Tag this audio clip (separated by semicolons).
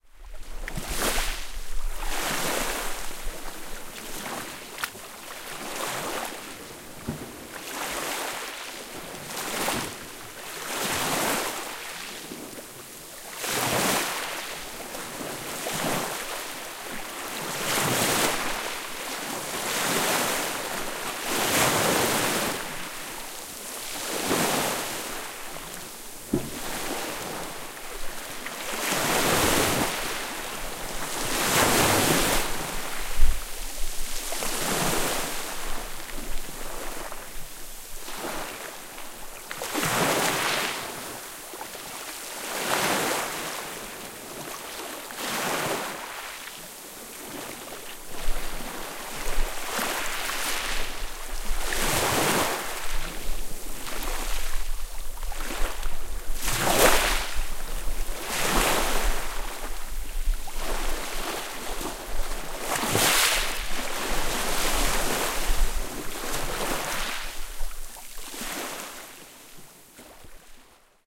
beach; water; waves; summer; seaside; sea; field-recording